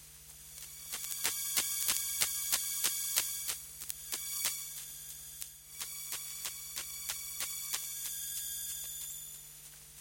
Ambience Spooky Electric Loop 00
An electric ambience sound to be used in sci-fi games, or similar futuristic sounding games. Useful for establishing a mystical spooky background atmosphere for building up suspense while the main character is exploring dangerous territory.
ambience, ambient, atmosphere, cinematic, dark, drone, electric, electronic, futuristic, game, gamedev, gamedeveloping, games, gaming, horror, indiedev, indiegamedev, loop, sci-fi, sfx, soundscape, spooky, video-game, videogames